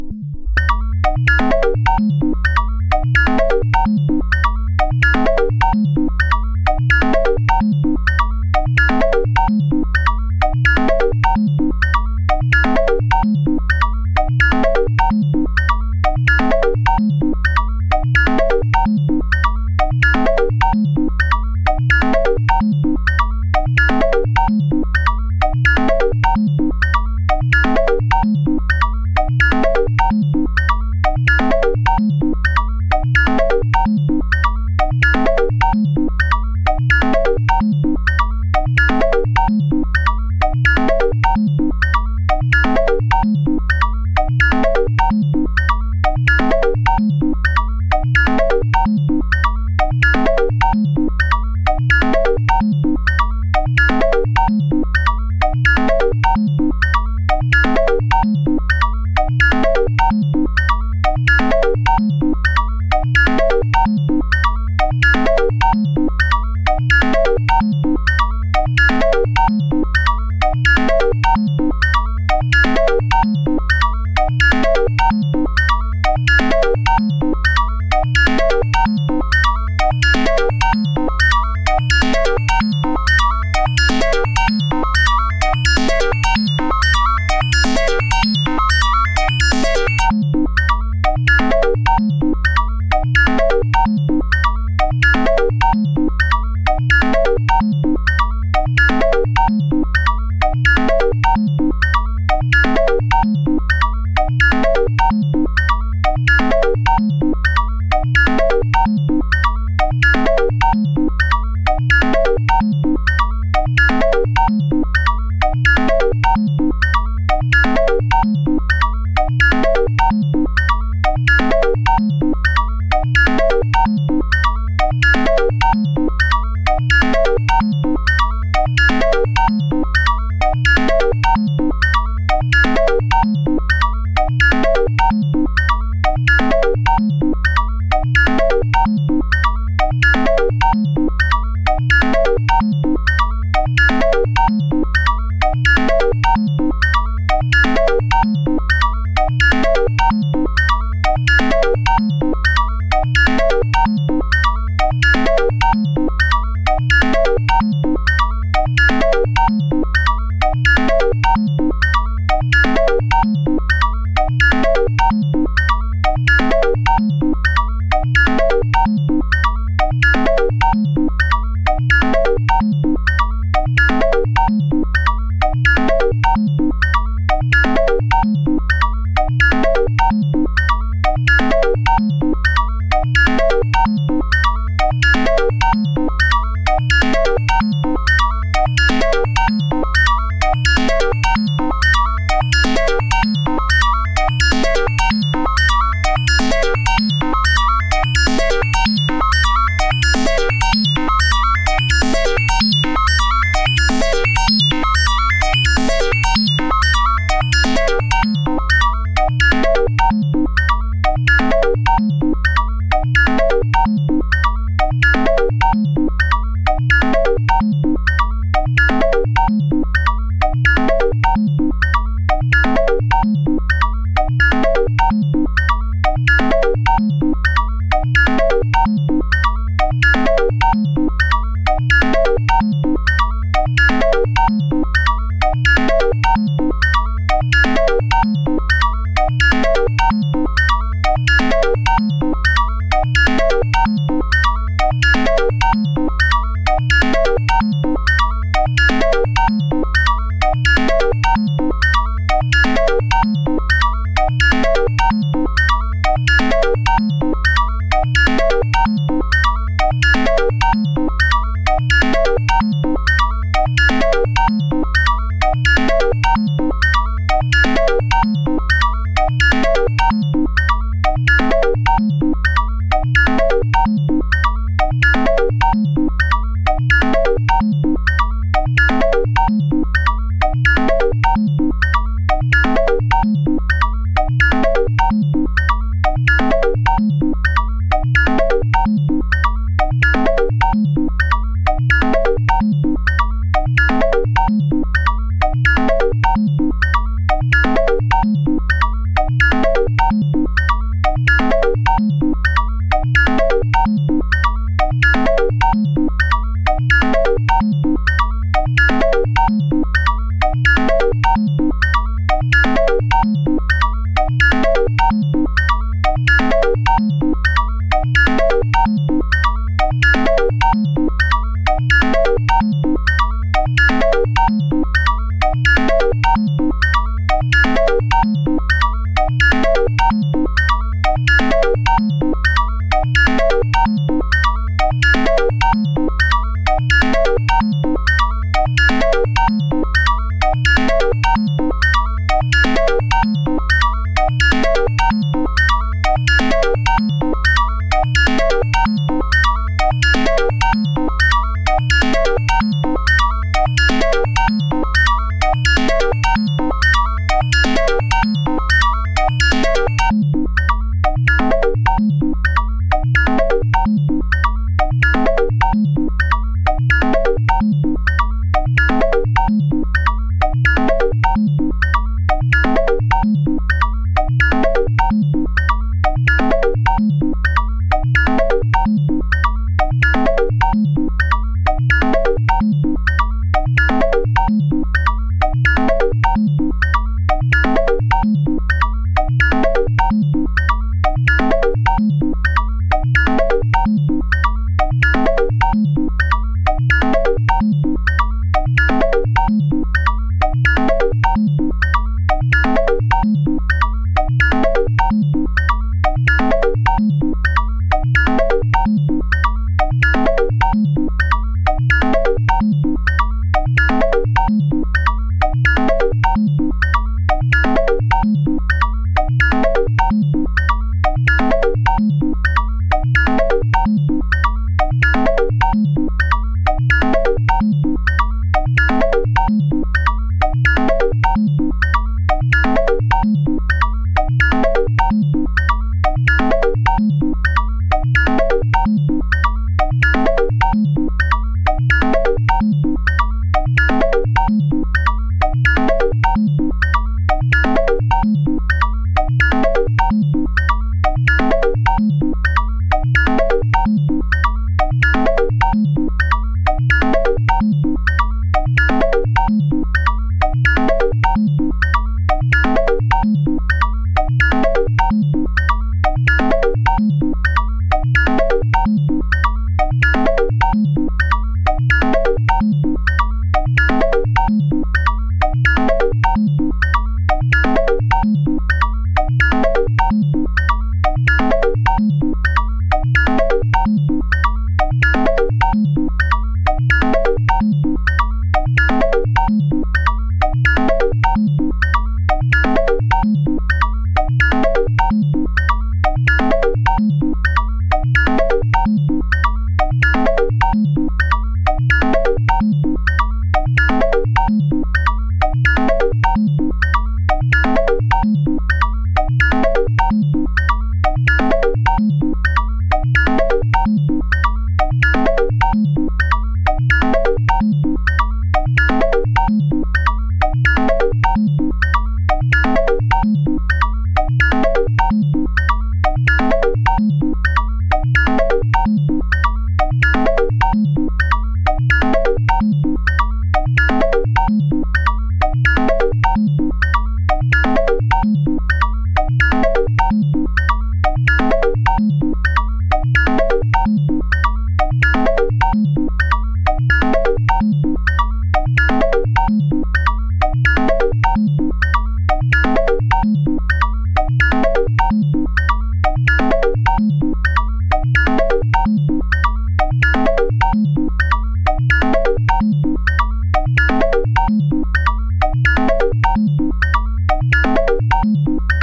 Unused Sequence that I recorded using my modular analog synth
modular, sequence